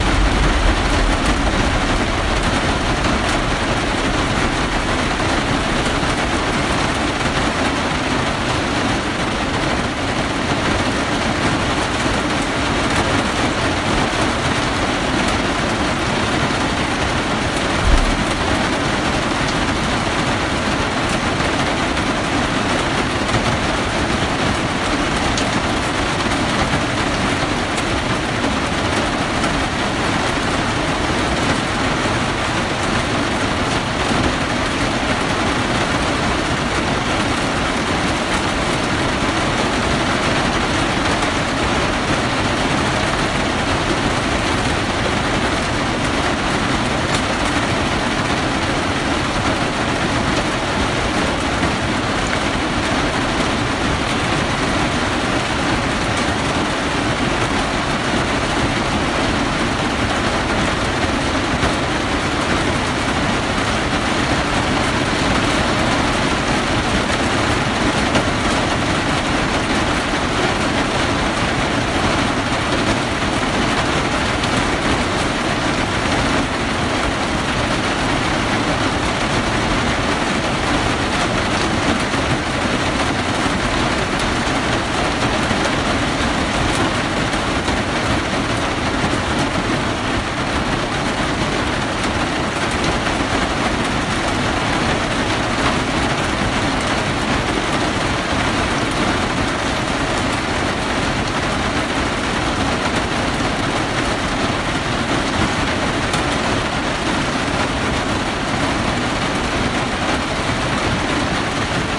rain caravan
A constant rain recorded from inside a caravan.